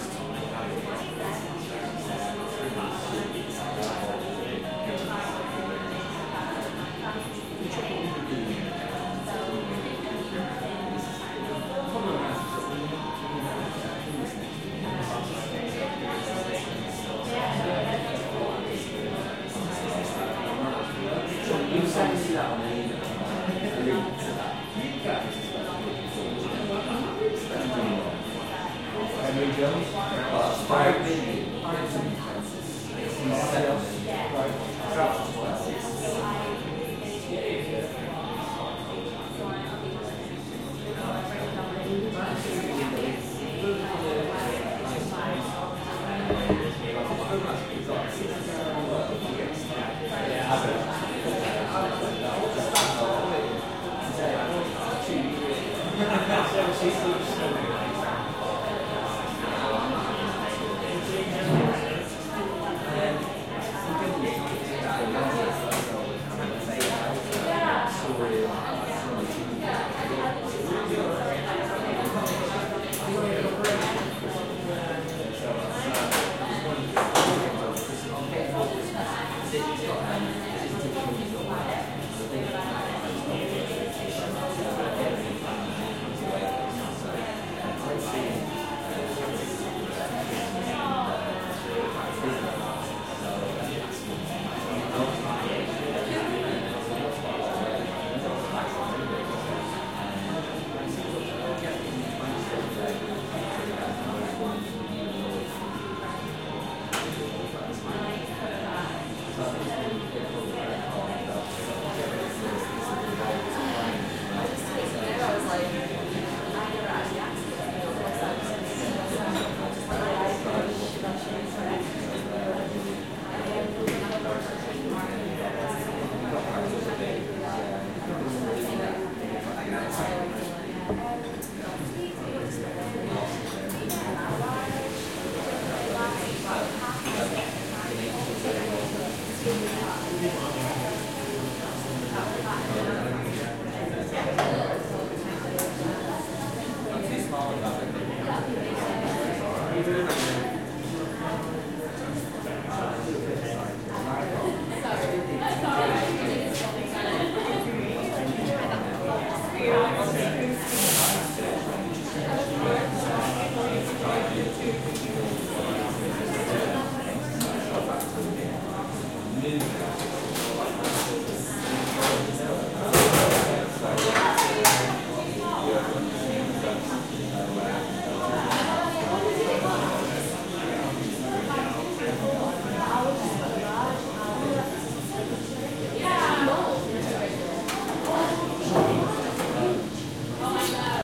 Ambience Coffee Shop 1
ambience, atmos, atmosphere, coffee, general-noise, shop